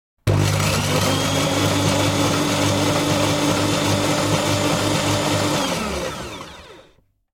An electric bread slicer.
Recorded with the Fostex FR-2LE and the Rode NTG-3.